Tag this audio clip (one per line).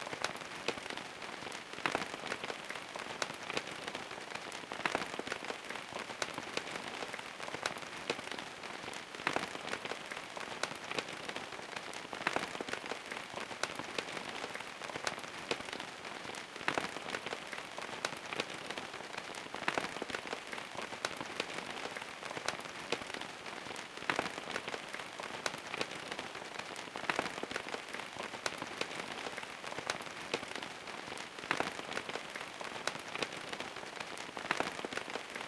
stereo
soundeffect
atmoshpere
rain
weather
sounddesign
water
texture
fx